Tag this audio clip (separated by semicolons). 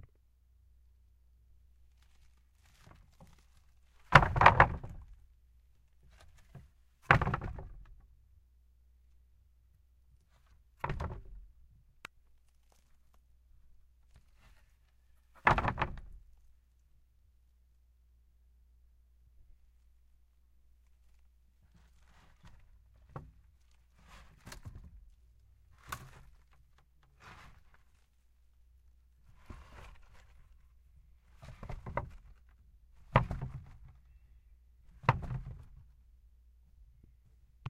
field-recording; impact; pallet; wood